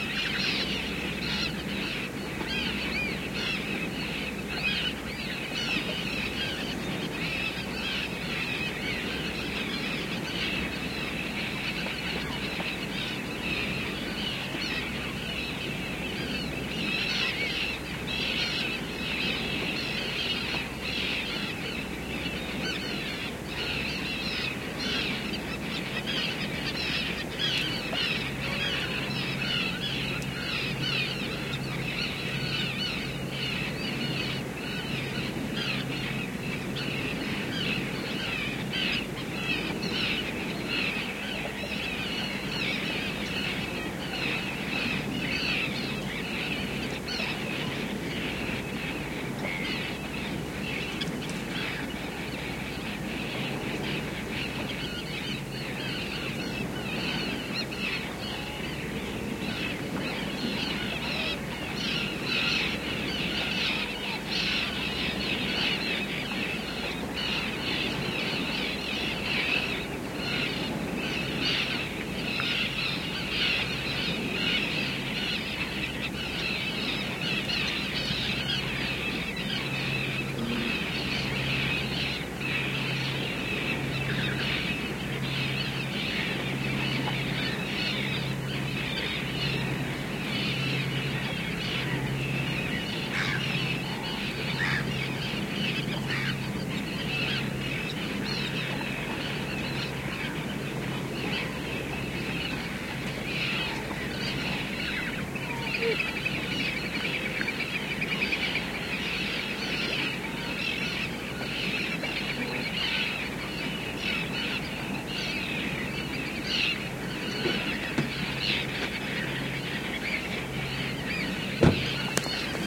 Seagull and engine activity (another perspective)
Another recording of a big flock of screeching seagulls from a height. You can also hear the constant hum of engines of industrial mussel farming in Chiloé.
Rec'd on a MixPre6 with LOM Usi Pro microphones.